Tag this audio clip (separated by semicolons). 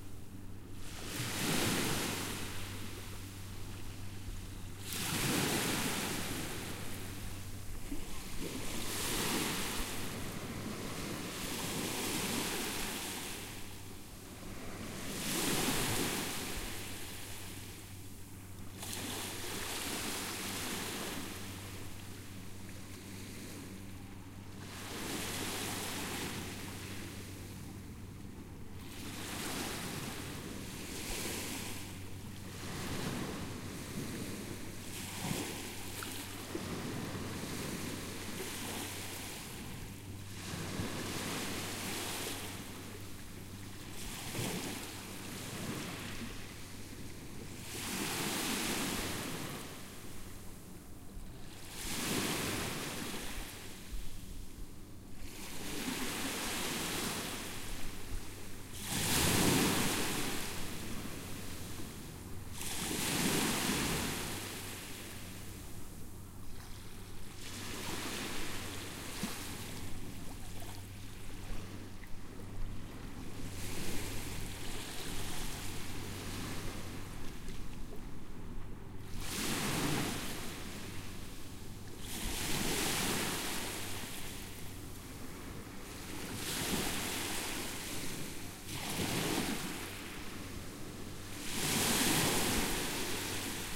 sea; waves; santorini; boat; shore